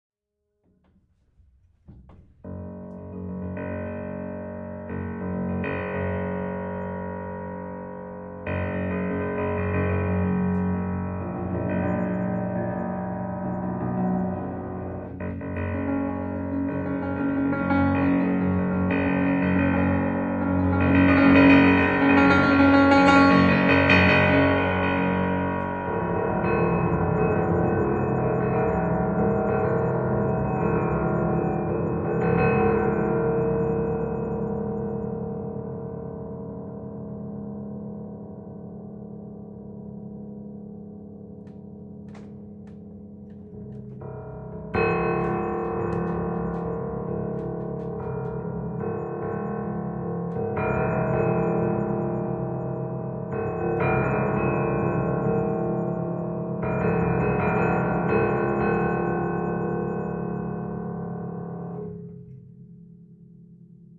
Upright Piano Dark Random 5
Playing around trying to make dark atmospheres with an upright piano. Recorded with RODE NT4 XY-stereo microphone going into MOTU Ultralite MK3.